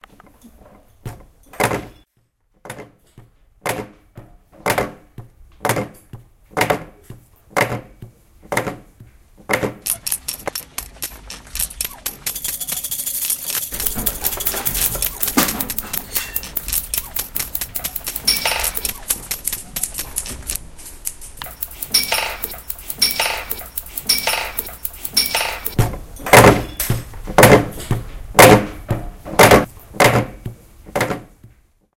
soundscape LGFR Timothé Marcel
French students from
Léon Grimault school, Rennes used MySounds from Germans students at the Berlin Metropolitan school to create this composition intituled "Crazy Computer".